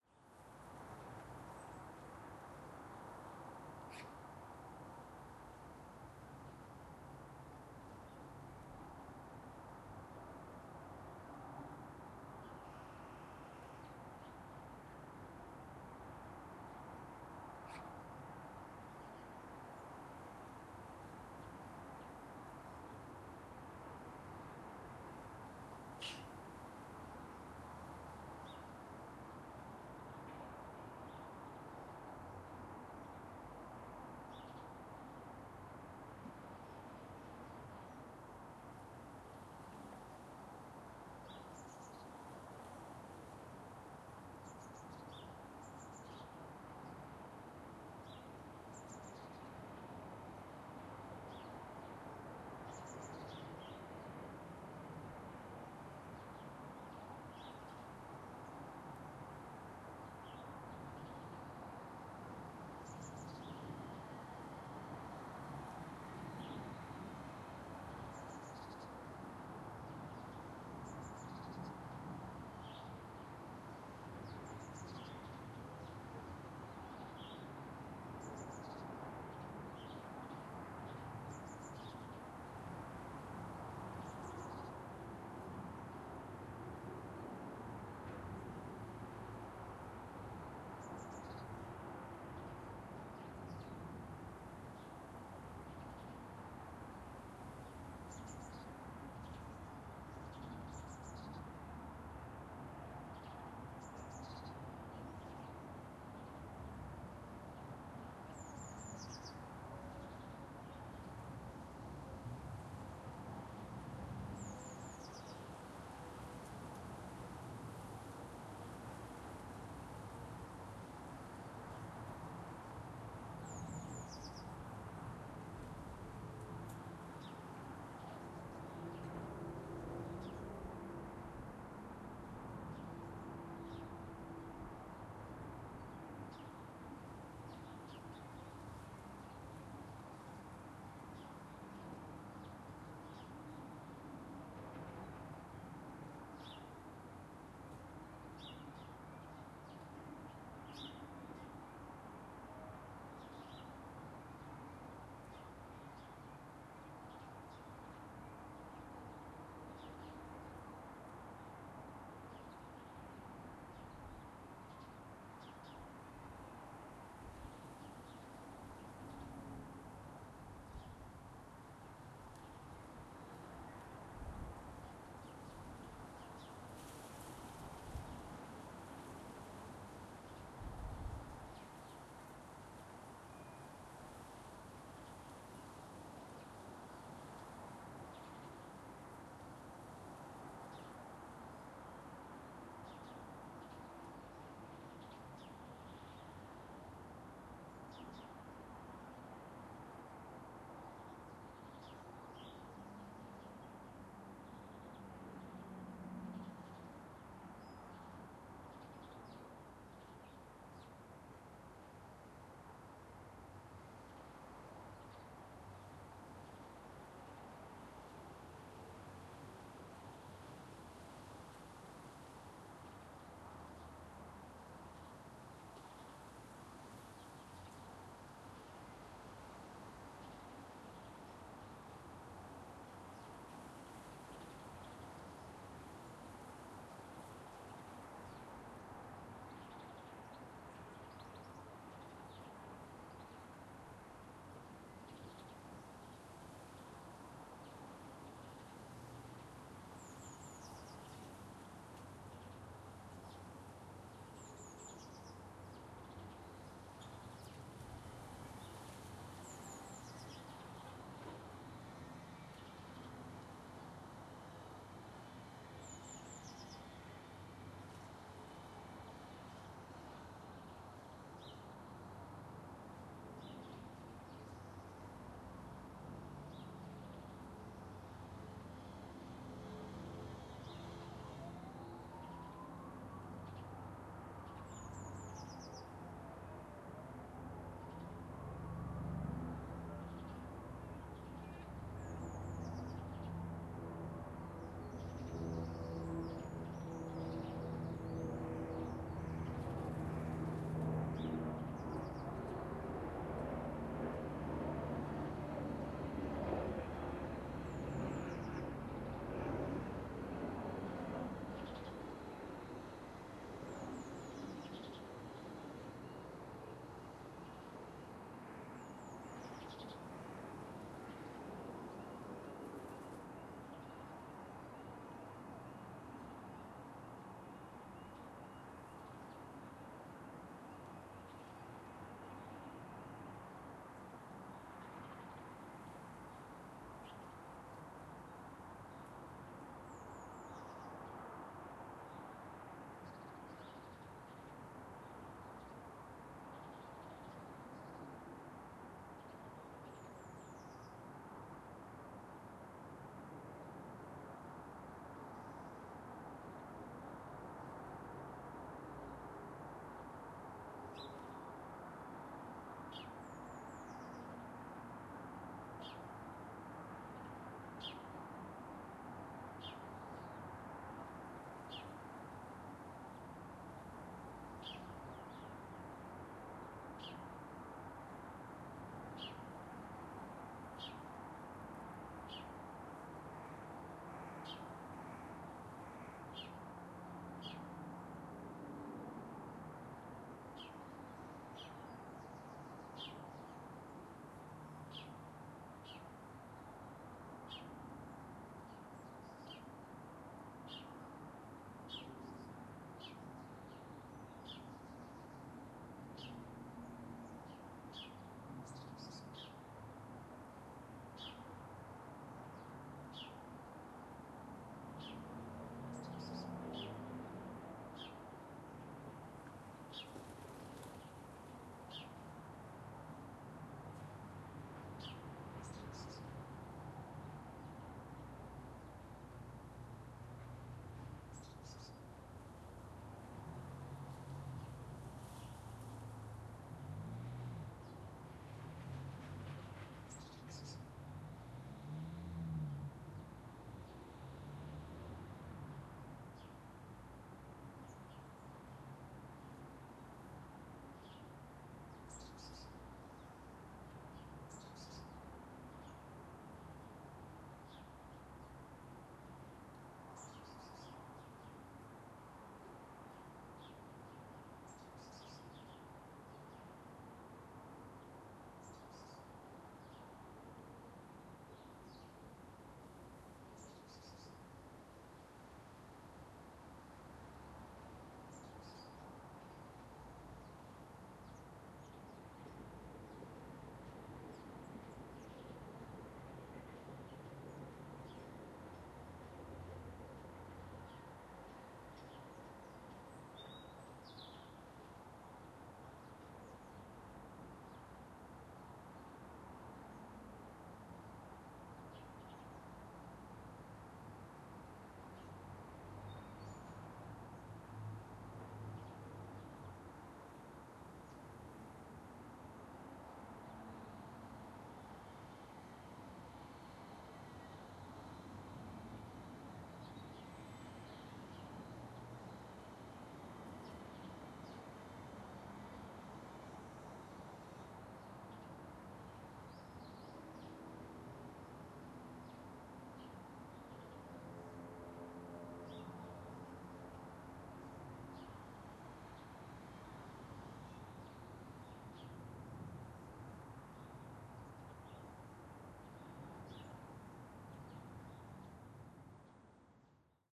London Suburban Garden Atmosphere
Recorded in a suburban garden using a Zoom H4N at 120%.
atmosphere, london, stereo, ambiance, garden, hq, suburban